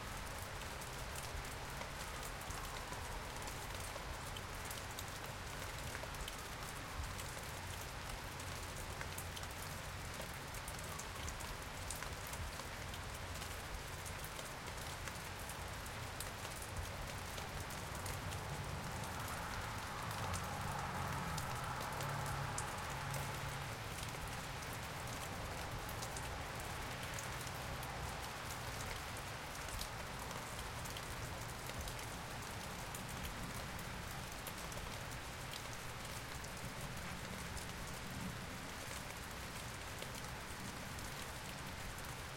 Rain with distant traffic
Rain in the Pacific Northwest. Distant traffic and a ambulance siren.
Stereo recording with Rode Stereo Videomic X into Sound Devices Mix-Pre3.